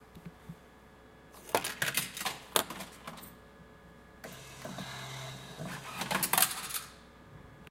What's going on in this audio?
20141118 candymachine H2nextXY
Sound Description: Einwerfen von Geld und Ausgabe eines Schokoriegels - Inserting Money and getting a chokolade bar.
Recording Device: Zoom H2next with xy-capsule
Location: Universität zu Köln, Humanwissenschaftliche Fakultät, Gebäude 213, Gang Erdgeschoss.
Lat: 6°55'14"
Lon: 50°56'1"
Date Recorded: 18.11.2014
Recorded by: Oliver Prochazka and edited by Vitalina Reisenhauer
Cologne, Field-Recording, University